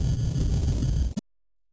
crushed drums
A drumloop rendered beyond recognition, angry!
noise, rage